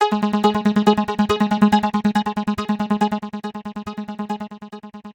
TR LOOP 0201
PSY TRANCE LOOP
loop, trance